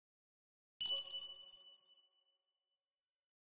A Computer/game pick-up or notification sound
computer, game, notification, ping